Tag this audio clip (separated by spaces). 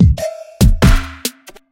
loop syncopated drum 140bpm dubstep